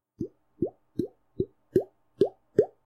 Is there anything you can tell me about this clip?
fake droplets
Fake droplet sound made with my mouth.